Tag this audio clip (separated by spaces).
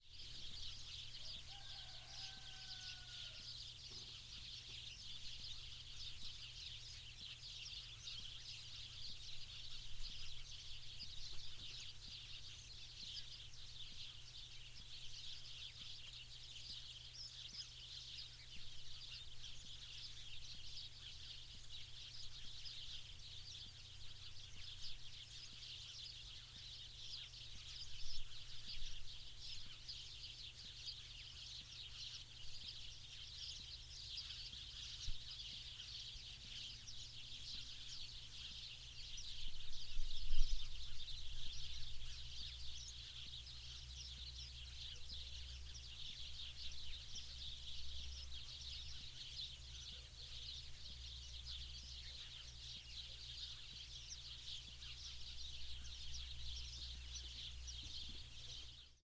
birds chirps noisy spring starlings